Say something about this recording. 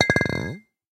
20170101 Big Wine Bottle on Ceramic Floor 14
Big wine bottle on ceramic floor, recorded with Rode iXY.